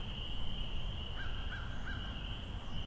Marsh/Creek ambience throughout.